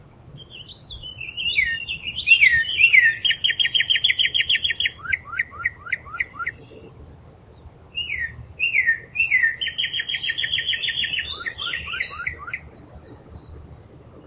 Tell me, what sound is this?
Spfd lake bird song
Bird song recorded at Springfield Lake in Springfield Missouri U.S.A.
ambience, birds, field-recording, nature, outdoors